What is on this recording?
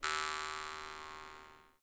jewharp recorded using MC-907 microphone